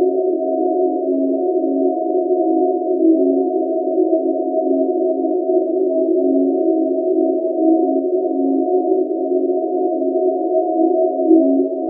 cloudcycle-cloudmammut.77
soundscape, ambient, divine, space, drone, evolving